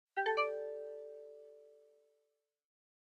Vintage Alert Notification 2 4
Synthetized using a vintage Yamaha PSR-36 keyboard.
Processed in DAW with various effects and sound design techniques.